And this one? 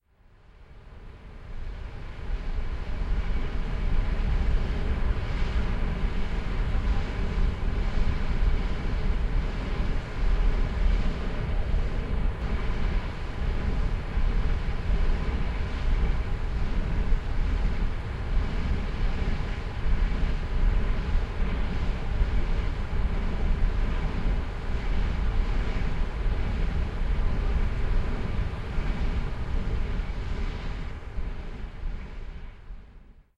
Omnia, flare noise 2
The second recording of the massive flare at the Sasol complexes of Natref refinery and Omnia fertilizer processing unit. You can here the massive flare 30 meters in front of me, the flare burns any toxic gasses witch are released during the production of fertilizer. Recording mode: binaural stereo. Chane used: Sound professionals sp-tfb2 into Zoom H4NPro.
flame, hot, burn, field-recording, rumble, gas, fire, rumbling, flare, industrial, combustion, flames, toxic, burning